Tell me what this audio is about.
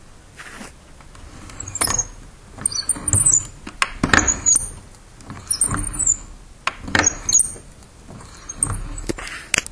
spinteles dureles cirpia
wooden door squeaks
door, squeaks, wooden